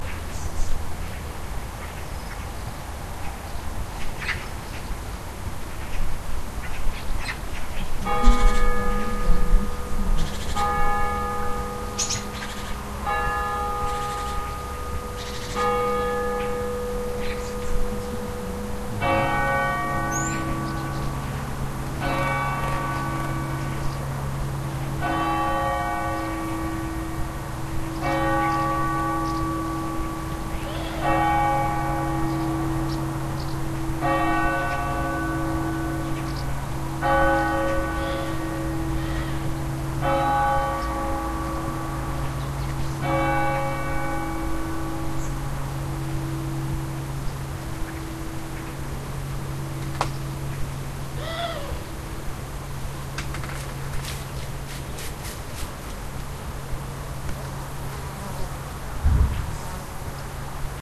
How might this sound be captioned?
Paderborn 9 o'clock
9 o'clock in the Paderborn's suburb "Auf der Lieth". Birds in the distance, street noise very far away, church bell of Sankt Hedwig rings 9 o'clock.
Recorded with ZOOM H1 and only normalized later.